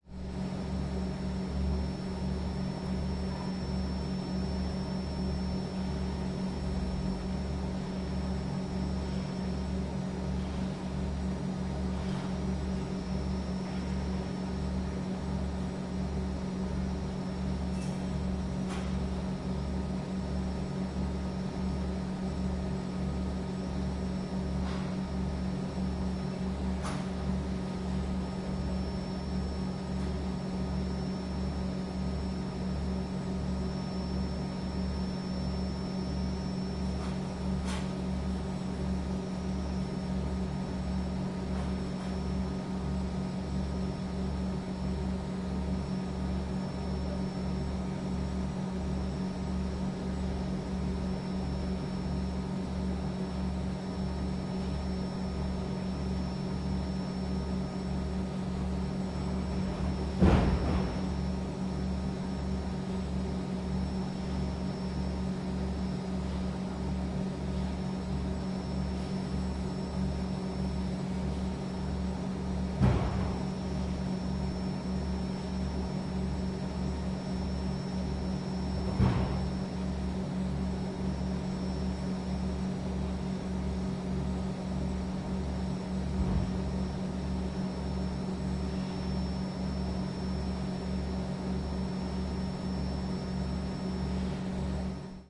110808-cooler store2
08.08.2011: ninth day of the ethnographic research about truck drivers culture. Neuenkirchen in Germany. The fruit-processing plant. Drone of the cooler store located in the courtyard of the plant.
cooler-store, field-recording, germany, neuenkirchen